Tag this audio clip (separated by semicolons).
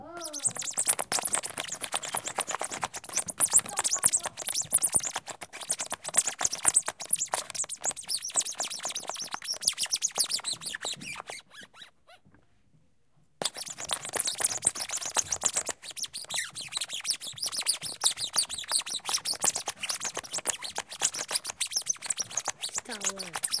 France; school; Paris; recordings